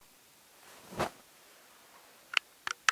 Throwing clothes on the floor #3
Throwing some pieces of clothes on the floor.